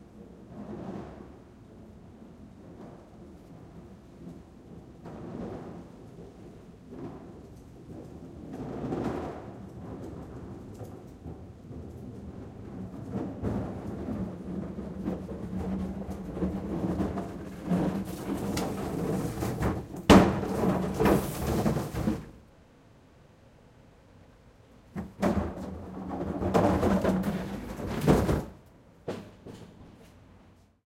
Recycle plastic blue trash bin pulling dragging edlarez vsnr
Recycle plastic big blue trash bin, pulling, dragging, edlarez vsnr
bin, dragging, garbage, pull, push, recycle, recycling, trash-bin, trashcan